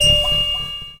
STAB 007 mastered 16 bit
A spacy alarm sound. Created with Metaphysical Function from Native
Instruments. Further edited using Cubase SX and mastered using Wavelab.
alarm, spacey